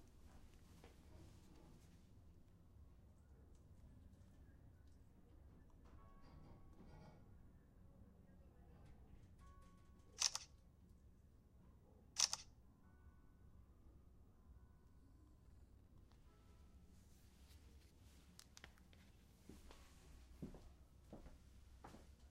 foto de celular
FOTO, SONIDO, CELULAR